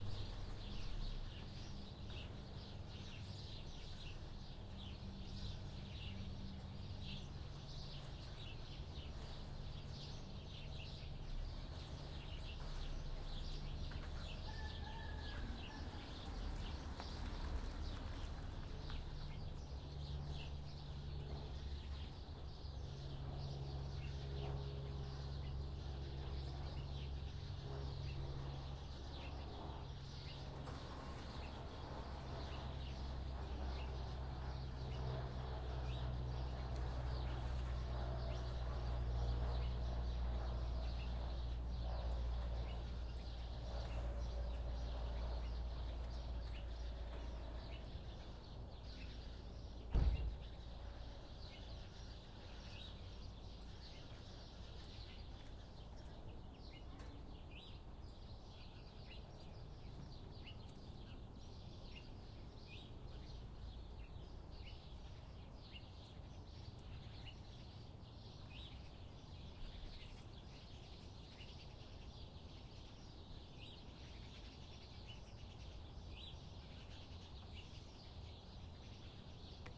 Sound of birds in trees, a plane overhead, a car drives by, normal neighborhood ambience
ambience, car, birds, plane, neighborhood